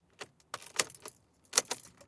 Turning Key.V2
Foley Recording of putting a key into a socket and turning the key